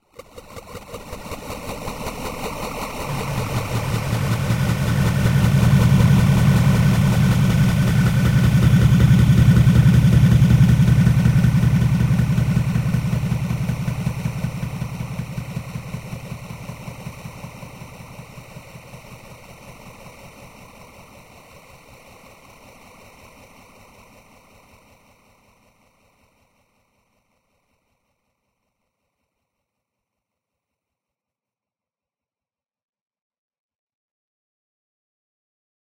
special fx
A dark creepy sound that come from below.
I used effect to make it. Honesty, I really don't know anymore.
texture, fx, creepy, swell, atmospheric, low